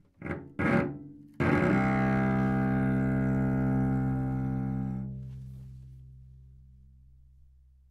overall quality of single note - cello - C2
Part of the Good-sounds dataset of monophonic instrumental sounds.
instrument::cello
note::Csharp
octave::2
midi note::25
good-sounds-id::2748
Intentionally played as an example of bad-attack-pressure